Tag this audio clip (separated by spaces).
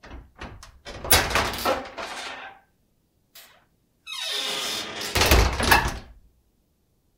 close SGH-6 open metal Zoom locker H5 squeaky